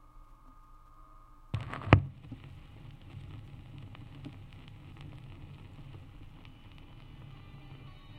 a record player playing a record.